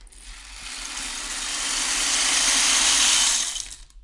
RAIN STICK B 001
This sample pack contains samples of two different rain sticks being played in the usual manner as well as a few short incidental samples. The rain stick is considered to have been invented in Peru or Chile as a talisman to encourage rainfall however its use as an instrument is now widespread on the African continent as well. These two rainsticks were recorded by taping a Josephson C42 microphone to each end of the instrument's body. At the same time a Josephson C617 omni was placed about a foot away to fill out the center image, the idea being to create a very wide and close stereo image which is still fully mono-compatible. All preamps were NPNG with no additional processing. All sources were recorded into Pro Tools via Frontier Design Group converters and final edits were performed in Cool Edit Pro. NB: In some of the quieter samples the gain has been raised and a faulty fluorescent light is audible in the background.
instrument, ghanaian, rain, ghana, chilean, chile, weather, peru, rattle, percussion, shaker, peruvian, rainstick, stick, storm